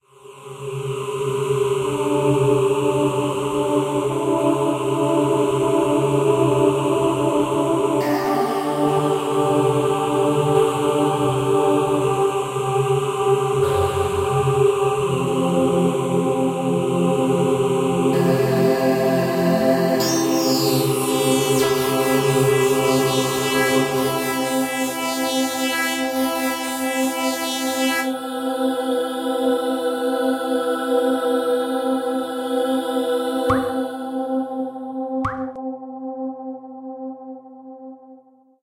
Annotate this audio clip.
Short piece of music to be played as background to show something scary.